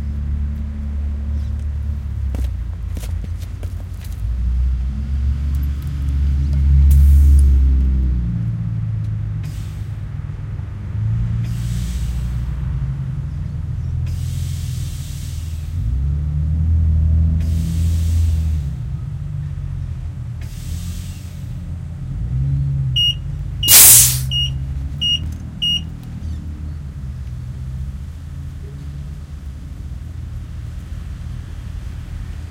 The sound of a tyre / tire being filled with compressed air. Mics were resting on the ground next to the tyre. Quite a lot of other car noises in the background unfortunately. I'll have to go back late one night and do it right. Recording chain: Panasonic WM61A (microphones) - Edirol R09HR (digital recorder).